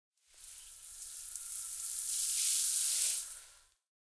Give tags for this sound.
hose; nozzle; sea-spray; ship; spray; water

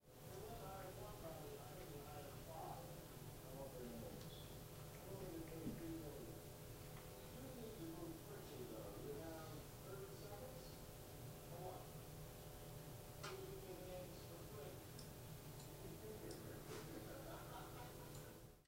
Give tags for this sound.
ambiance; ambience; ambient; atmo; atmos; atmosphere; atmospheric; background; background-sound; general-noise; noise; office; room; room-noise; soundscape; talking; tv; white-noise

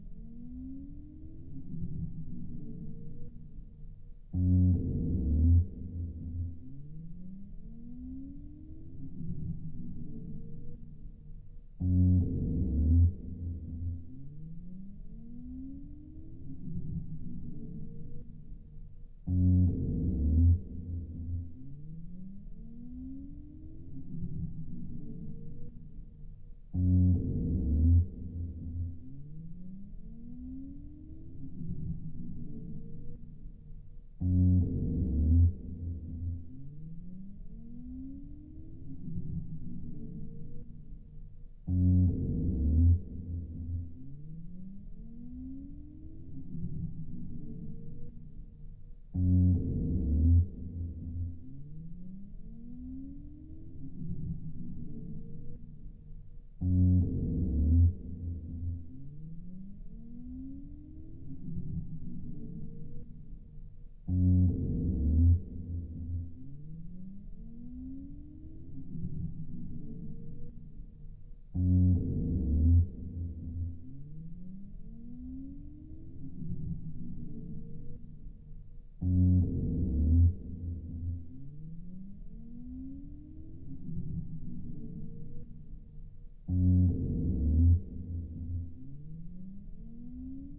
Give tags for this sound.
eerie; electronics; loop; sapling; sci-fi; siren; slow-mo

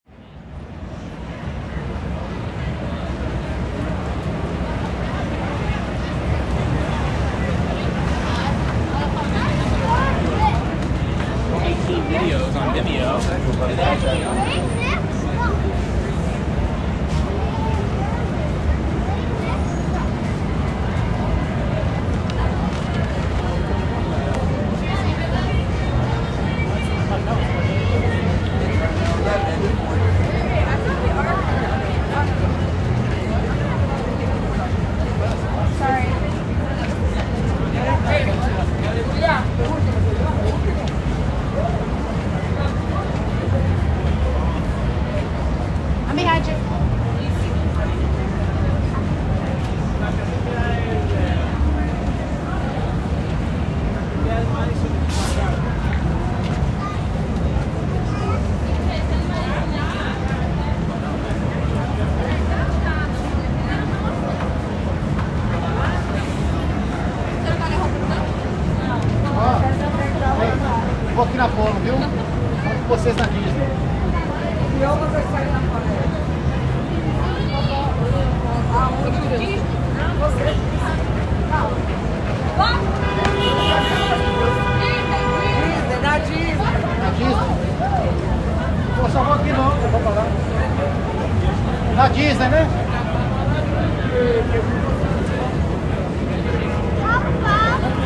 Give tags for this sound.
York; crowd; pedestrians; outdoor; square; ambience

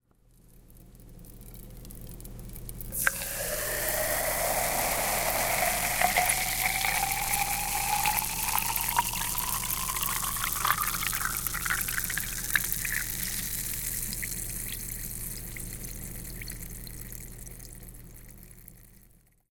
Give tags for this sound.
pop; drink; fizzy; carbonated; coke; carbonation; pour; soda; glass; fizz; ice; can; fill

